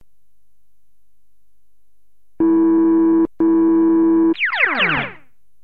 scifi phone - scifipuhelin
imaginary scifi phone, two tones and answering sound. done with clavia nordlead2 and recorded with fostex vf16
phone, synthetic, imaginary